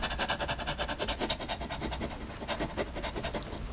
Dog panting (human made)
dog, foley, panting